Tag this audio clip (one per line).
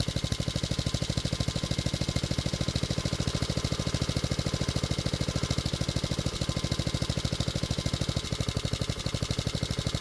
cutter
engine
lawn
lawnmower
motor
mower
startup